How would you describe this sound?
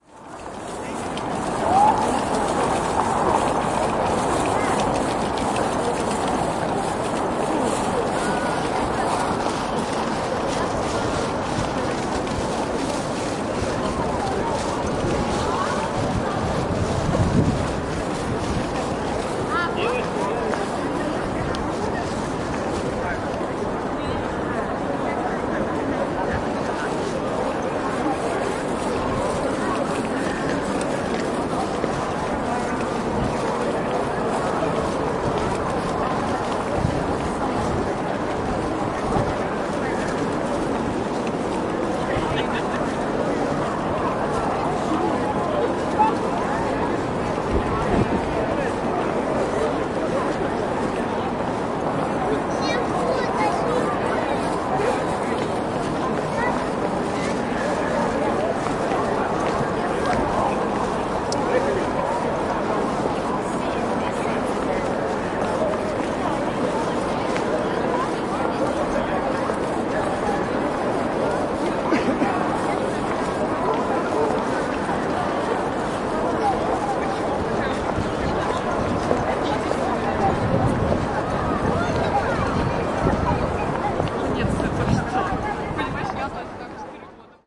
Walking Around Outside The Kremlin in the Afternoon
The sounds of a moderately busy Autumn afternoon right outside of The Kremlin, where many tourists and locals gather for sightseeing and festivities in Moscow, Russia.
Recorded with a SONY ICD-UX560F
ambience, ambient, atmosphere, capital-city, city, field-recording, general-noise, kremlin, moscow, noise, outside, people, russia, russian, soundscape, travel, walking